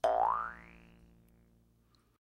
Jaw harp sound
Recorded using an SM58, Tascam US-1641 and Logic Pro